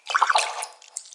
Water sound collection
hit, water, wet, splash, drip, drop